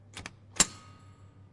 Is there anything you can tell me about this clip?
shiffter refresh machine
Sound producced by a shiffter of a refresh machine in Tanger building.
Recorded sound is clean and clear. Reverb of sound is perceived.